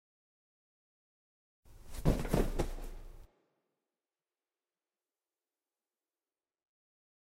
Crumpling to floor
This sound was used for an alien lizard collapsing to the floor